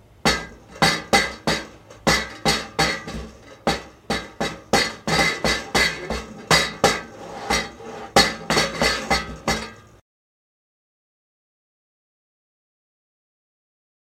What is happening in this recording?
Tin Banging
tin top banging on a hard wood surface
inside, hard, recording, surface